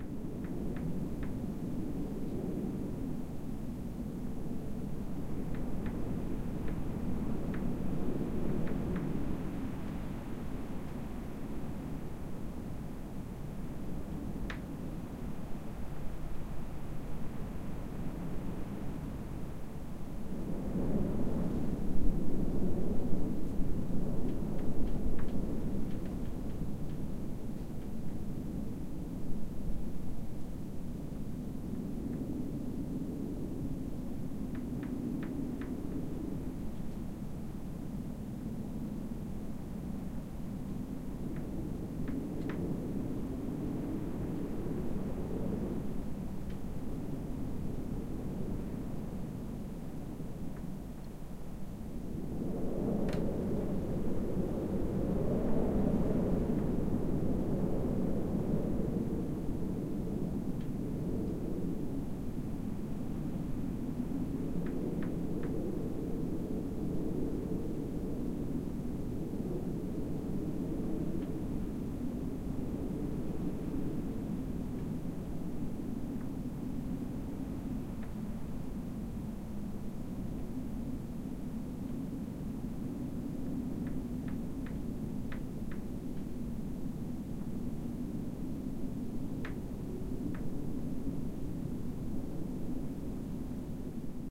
Wind in a wooden shed, winter, no birds. Some creaks.